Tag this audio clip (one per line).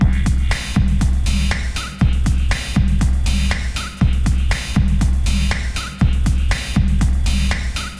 ableton
loop
battery